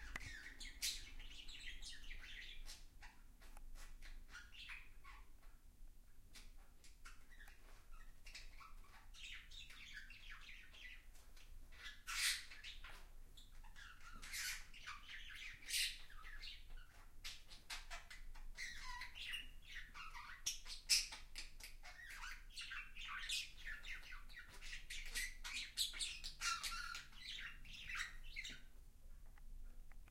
SonicSnaps CCSP birds
Field recordings captured by students from 6th grade of Can Cladellas school during their daily life.